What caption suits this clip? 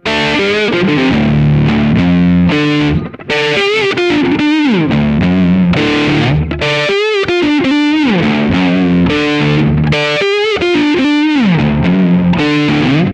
blues.loop03.distort
a few looping blues bars played on Ibanez electric guitar>KorgAX30G>iRiver iHP120 /unos cuantos compases de blues tocados en una guitarra electrica con distorsion
distortion, electric-guitar, musical-instruments, blues